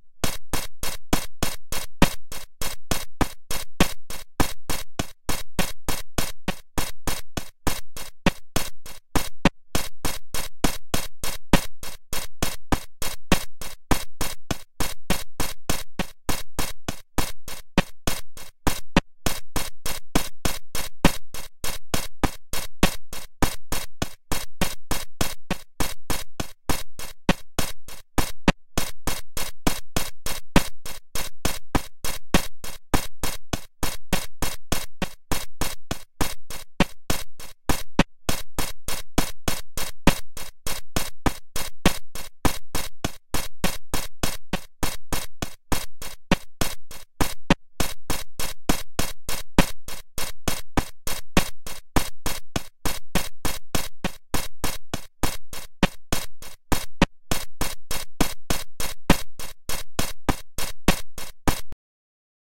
Audio 19Patt 13 100 bpm19
The sound chip of the HR 16 has a LOT of pins. A ribbon cable out to a connection box allows an enormous number of amazing possibilities. These sounds are all coming directly out of the Alesis, with no processing. I made 20 of these using pattern 13, a pattern I'd programmed a long time ago. But I could have made 200.. there's so many permutations.
Alesis, circuitbent, glitch, percussive